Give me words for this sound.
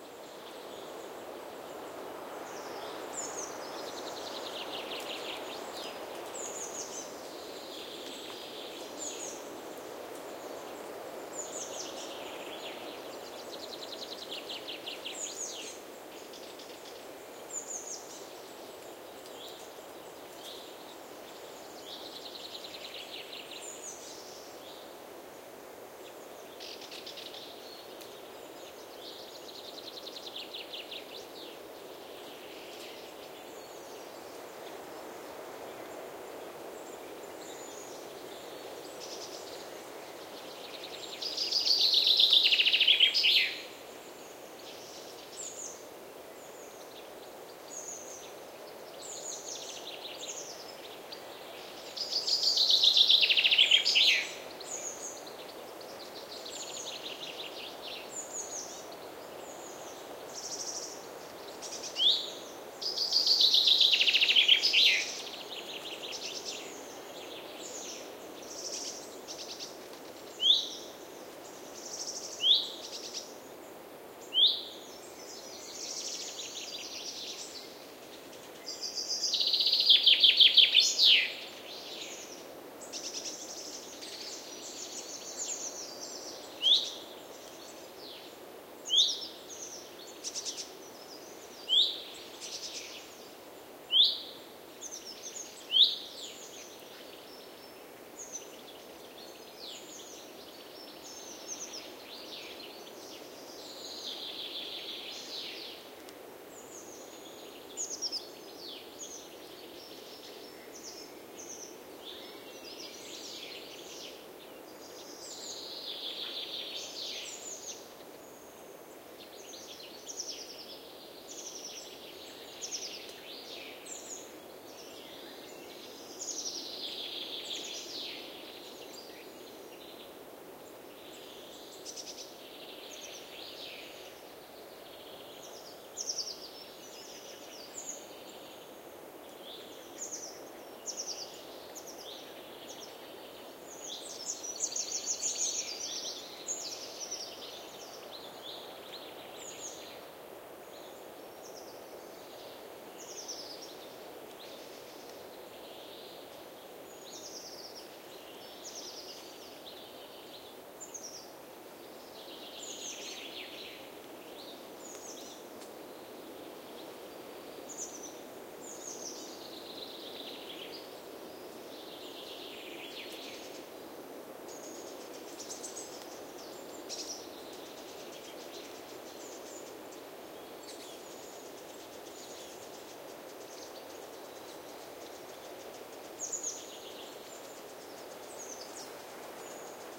Pine forest ambiance in spring. Birds (mostly Chaffinch, Serin, Blackbird and Warblers), wind on trees and some falling drops. This sample is very dynamic (for a nature recording), as the Chaffinch was a powerful singer and it was quite clos (other birds sound bewildered, actually). A clean, but not exactly relaxing sample.
Sennheiser MKH30+MKH60 into Shure FP24 and Edirol R09 recorder. Done near Hinojos, S Spain, around 10:30AM